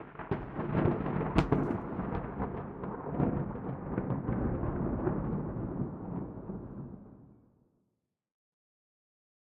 balfron thunder L
Field-recording Thunder London England.
21st floor of balfron tower easter 2011
England, Field-recording, London, Thunder